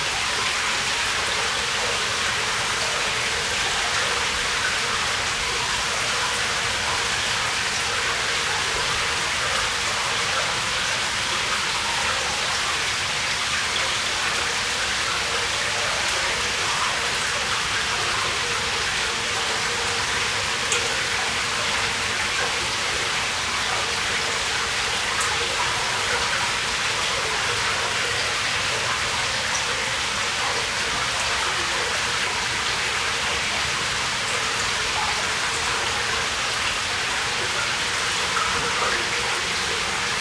culvert close
Monophonic recording with hypercardioid mic pointed into a culvert / storm sewer. Some minor EQ and editing.